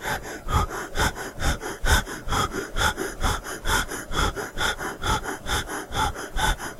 Male Medium Breathing 01
medium, man